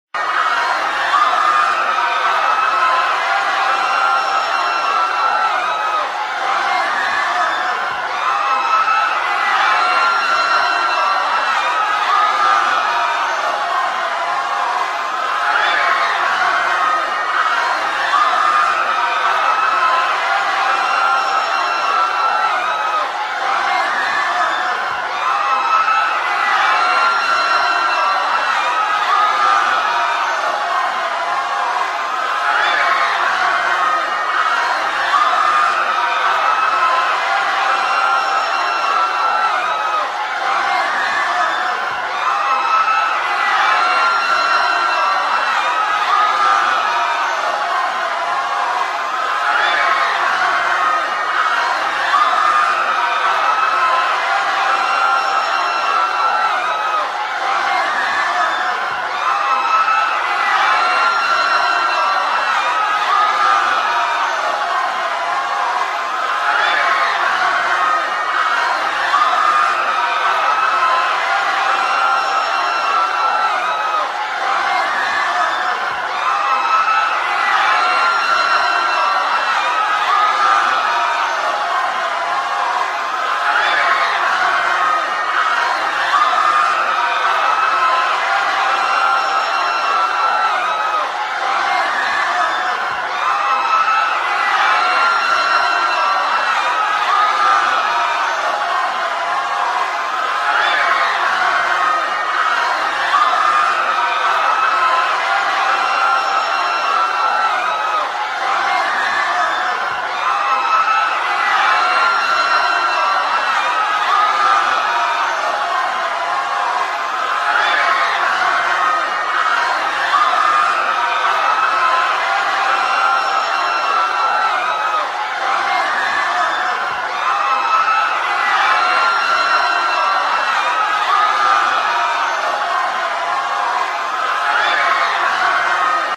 Here we find a crisp overlay of various screaming voices and background noise to make a great panicking crowd sound.
Crowd, Panic